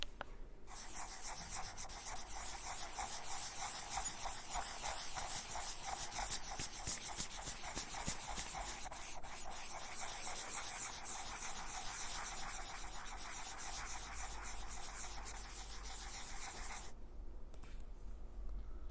mySound Sint-Laurens Belgium Stift
Sounds from objects that are beloved to the participant pupils at the Sint-Laurens school, Sint-Kruis-Winkel, Belgium. The source of the sounds has to be guessed.
mySound, Sint-Kruis-Winkel, Stift, Belgium